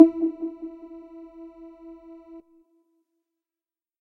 THE REAL VIRUS 09 - SUB BAZZ - E4
Big bass sound, with very short attack and big low end. High frequencies get very thin... All done on my Virus TI. Sequencing done within Cubase 5, audio editing within Wavelab 6.
bass, multisample